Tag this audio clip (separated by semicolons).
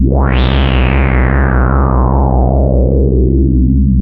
horror; synthesis